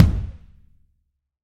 MTLP KICK 002
Several real kick hits layered and processed. Includes ambient samples captured in a 2400sqft studio. Example 2 of 3